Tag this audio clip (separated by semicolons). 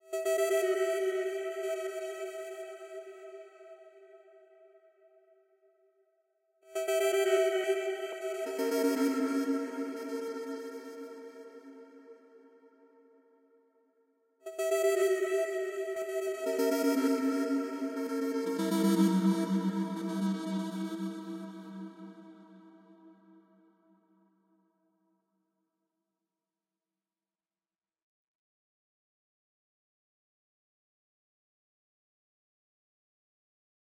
reverb; soundeffect